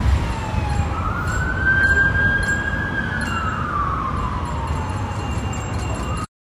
ambulance sound
sound of ambulance, siren
siren, ambulance, firetruck, emergency